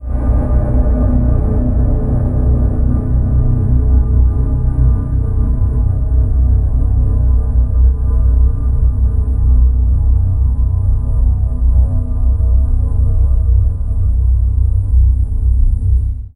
A shadow like sound.